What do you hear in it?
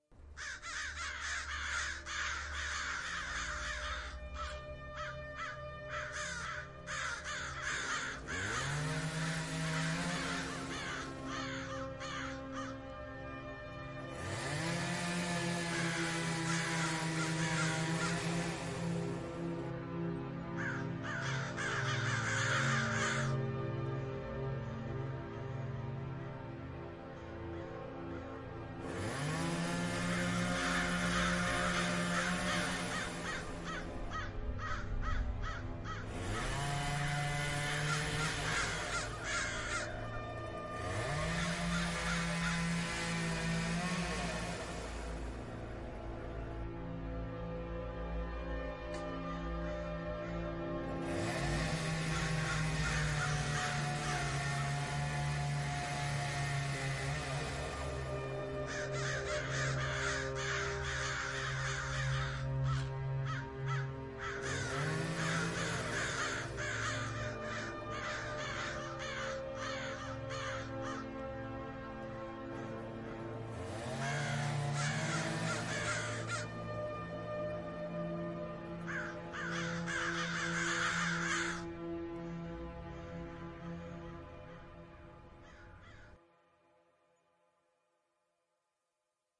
Autumnal VO Bed
I wanted a voice over bed that evoked that autumnal/fall feeling, which to me means crows chattering and distant chainsaws preparing for winter.
Crows by blimp66
bed, crows, voice-over, chainsaw